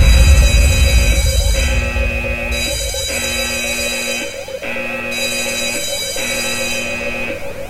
extreme alarm
A composite alarmsample. Loop this sample on a huge stereo with decent volume and report back :) The sample is optimized for dynamics, so it's not overcompressed.This sample also contains a firealarm bell which is absent in the other sample in this pack.